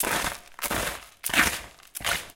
Kitty Litter Scrape 02
Scraping around cat litter tray with a plastic cleaning scoop.
tray, scraping, cat-litter, scrape, kitty-litter